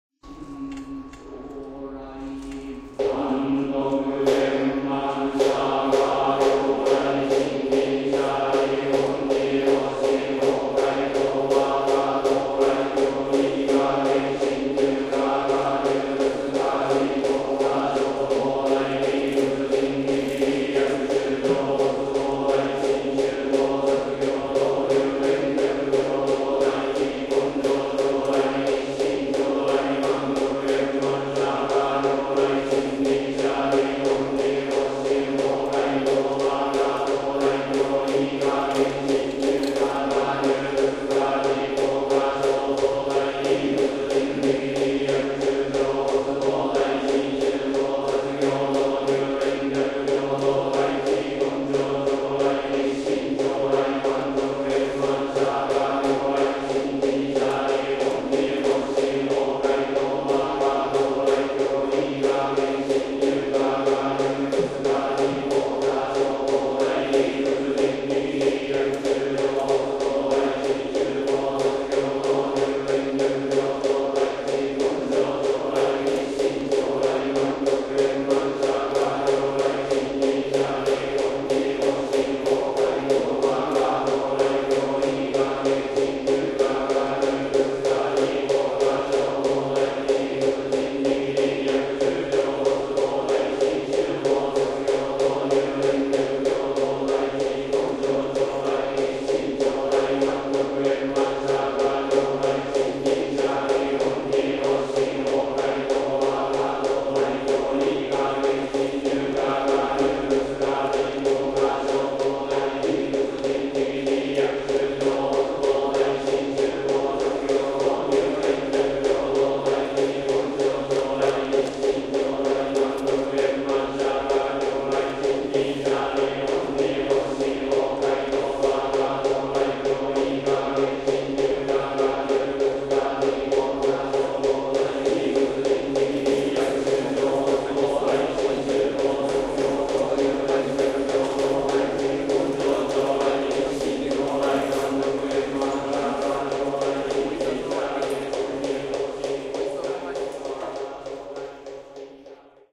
Recorded in Osaka Shitennoji Temple, 19.07.2013. All monks sing together in a rhythmic way accompanied by mokugyo strokes on each syllabe. Recorded with internal mics of Sony PCM-M10.